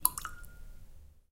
water drop 2
a water drop